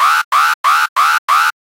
5 short alarm blasts. Model 3